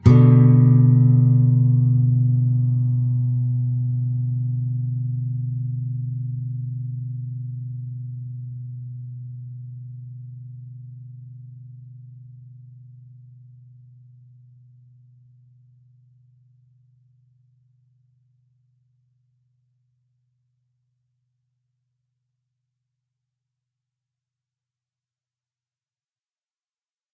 This is a part of the G Major chord, but it sounds like a B minor. The A (5th) string 2nd fret, D (4th) string open, and the G (3rd) string open. Down strum. It's used well as a bridge chord between C Major and A minor. If any of these samples have any errors or faults, please tell me.